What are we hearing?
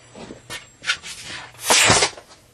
Balloon Deflation 10116a
A skinny balloon deflating suddenly after being removed from a pump. There are some breathy, hissing noises at the start as I fumble with the balloon.
Recorder: Olympus digital voice recorder.
Microphone: Sony ECM-MS907.
balloon, deflate, fast, sudden, hiss